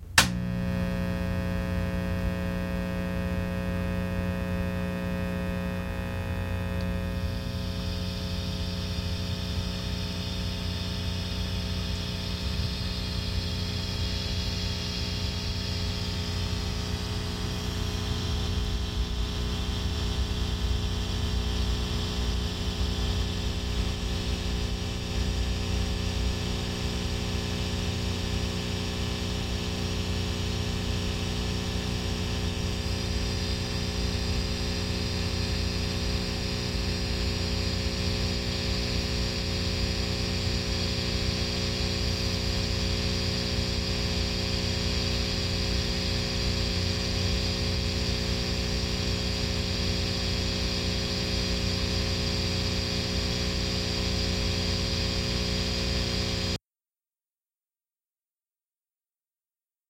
VOX 60 cycle hum
This is a VOX Guitar Amp switched on emitting the typical 60 Cycle Hum at top volume.
60, buzz, cycle, hum, noise